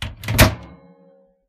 Microwave door close
Sound of a microwave oven closing. Recorded with an iPhone SE and edited with GoldWave.